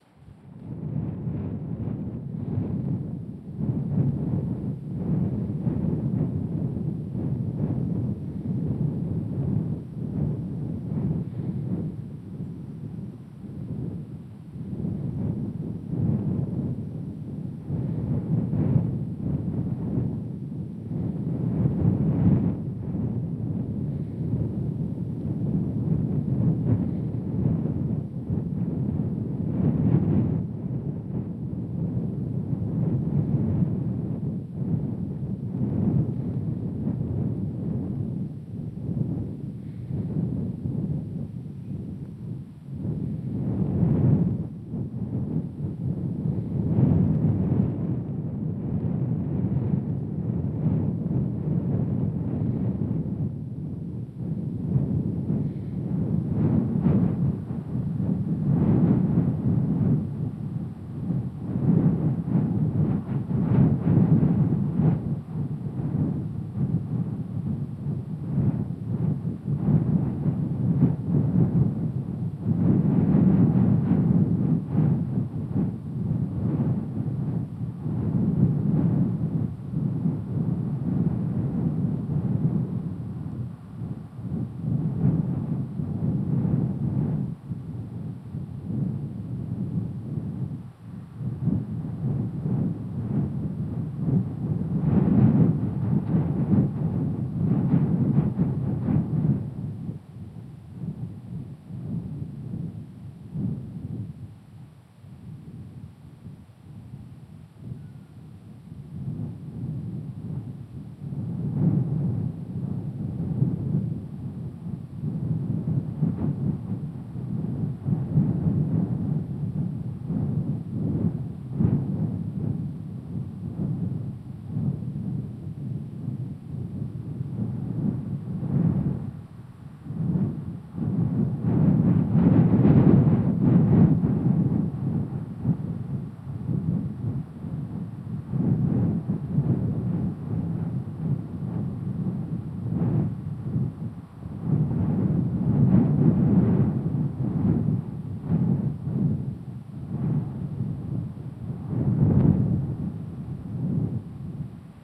Neutral Wind
Wind with very little extra noise (no leaves, grass, etc.). Recorded by piling stones into a makeshift wall full of holes and hiding the recorder in a motorbike helmet behind it. Mau Son, Vietnam. Recorded with a Tascam DR-7 by Mathias Rossignol.